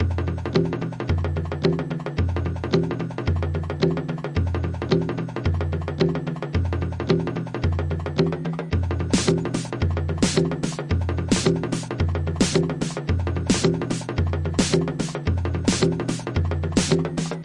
Two variations of one loop, 110 bpm, each part loops perfectly.